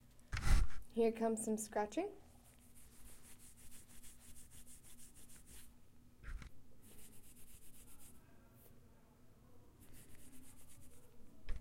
scratch paws scrape